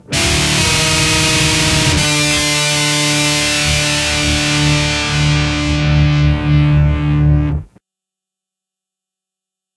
Metal guitar loops none of them have been trimmed. that are all with an Octave FX they are all 440 A with the low E dropped to D all at 130BPM